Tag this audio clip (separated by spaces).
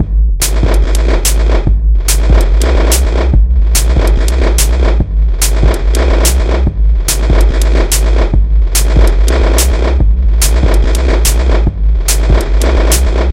experimental glitch-hop loop monome recordings rhythm undanceable